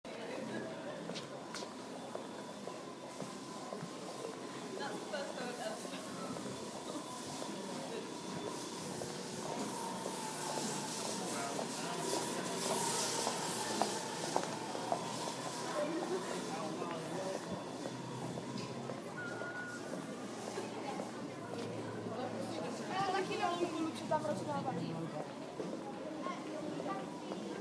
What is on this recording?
footsteps in the street